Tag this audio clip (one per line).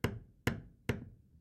far
outside
nails
hammer
nail
hitting
wood
pounding
outdoors
banging
away
knocking
hammering